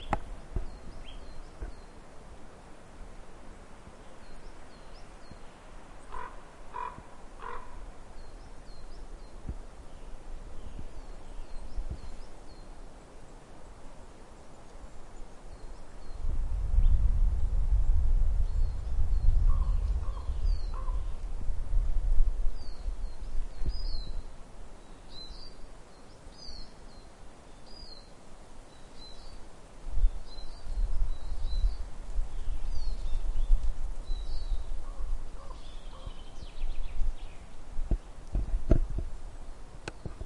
birds in the woods
An ambience of wind in the trees and birds singing in the forest in nothern Bohemia (Czech republic). At some points the mics are overwhelmed with wind. Recorded with Zoom H4N and normalized.
woods, birds